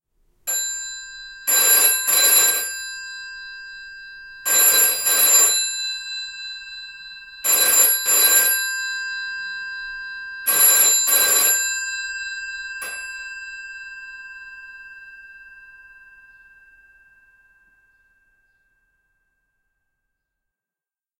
Full Room 4 rings
phone, GPO, analogue, Landline, office, retro, post, telephone, 60s, 80s, 746, 70s